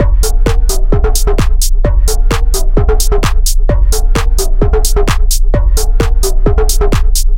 Created using FL Studio 12.
Tempo: 130 bpm.